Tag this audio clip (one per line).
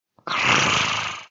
Animal
Dog
Growl